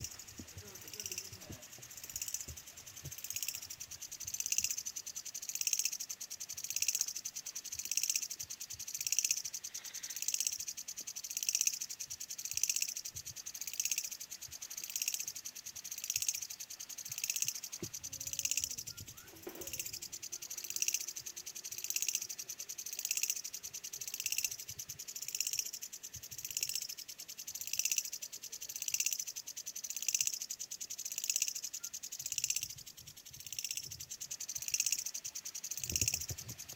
Night sounds in an Indian forest
ambience, location, sound